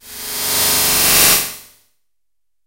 Electronic musquitos E3
This sample is part of the "K5005 multisample 18 Electronic mosquitoes"
sample pack. It is a multisample to import into your favorite sampler.
It is an experimental noisy sound of artificial mosquitoes. In the
sample pack there are 16 samples evenly spread across 5 octaves (C1
till C6). The note in the sample name (C, E or G#) does not indicate
the pitch of the sound. The sound was created with the K5005 ensemble
from the user library of Reaktor. After that normalizing and fades were applied within Cubase SX.
multisample, noise, mosquitoes, reaktor